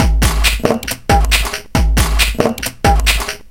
tascam dr05 + softwares 137 bpm